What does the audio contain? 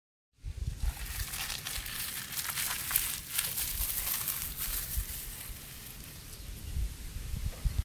Gravel sound
Something being dragged over a section of gravelly ground. The sound recedes as the object is dragged further away.